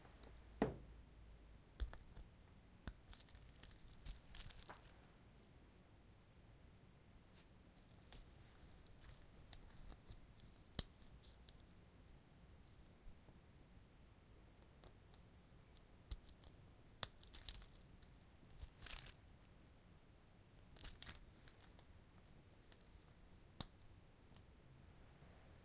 Wrist watch open and close
I wanted to record some sounds for Dare-12 but was travelling abroad. I did not have with me most of the items/objects I would normally touch on a daily basis.
My wrist watch was one of the few I had with me. It is an all metal watch (inox). This is the sound of me opening and closing the metal bracelet several times.
recorded with a Zoom _H1, built in mics about 15cm away from the watch.